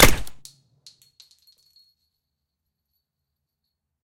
Pistol - perfect shot with silencer
I've created this sound for my project by layering a lot of sounds together, since I couldn't find the right sound here.
one-shot, perfect-shot, pistol, pistole, schalldaempfer, schuesse, schuss, shot, silencer, weapon